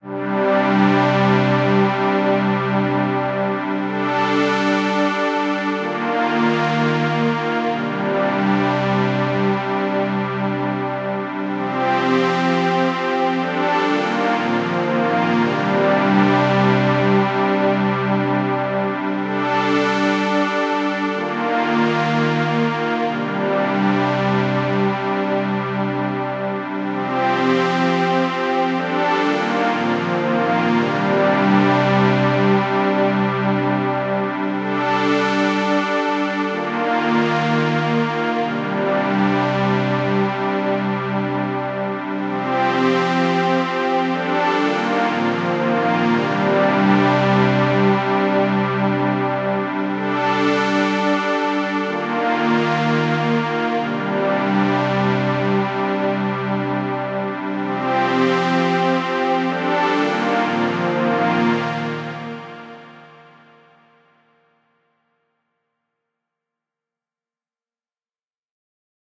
LEARNING - TWO BACKS MIX - RUBEN PAD
This is a part of the song who i consider is the most important in this mix version. There have 5 parts of the strings and pad, and the conformation if you listen attentionally.
pad, mix